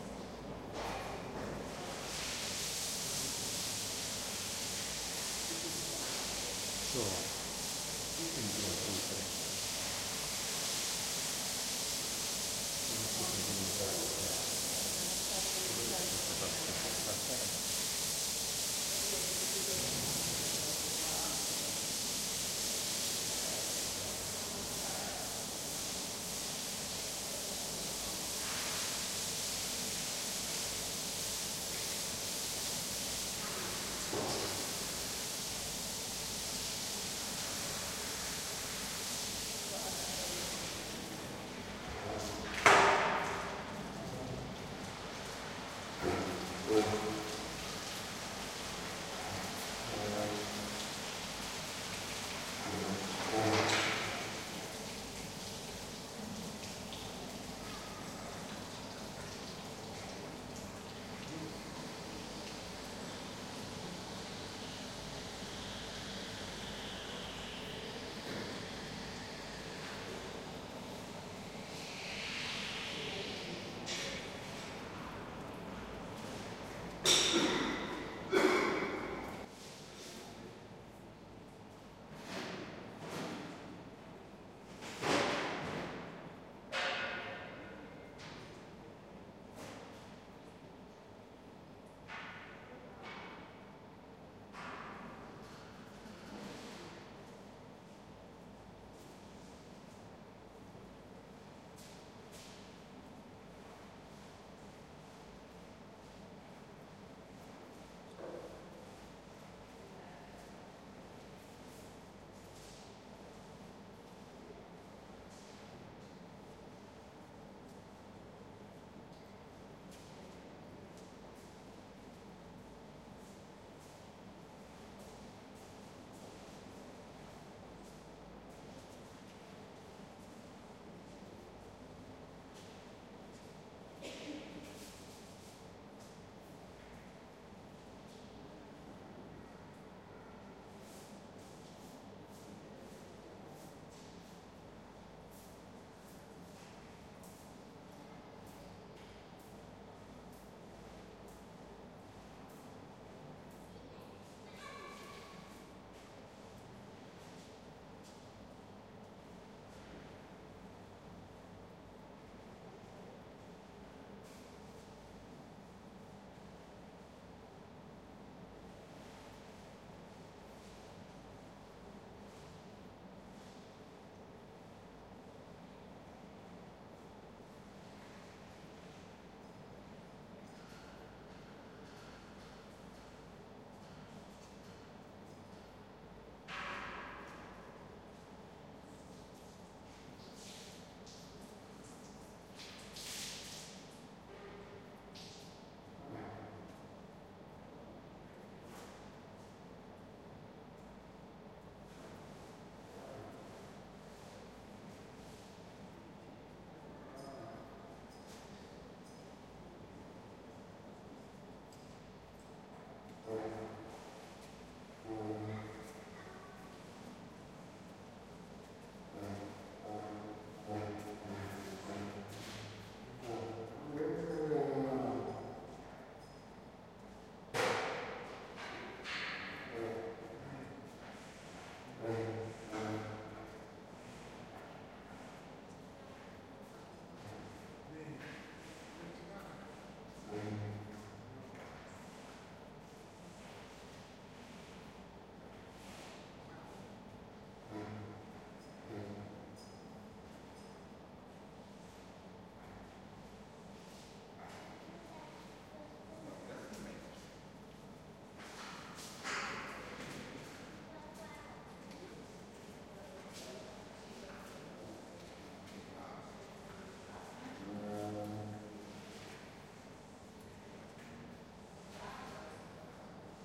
Recorded by Zoom H2 in Berlin Zoo December 2009. Outsidethe tiger cage. Some worker washing empty cage. Tiger walking in its cage and sometimes roaring.
berlin, flickr, tiergarten, tiger, zoo, zoomh2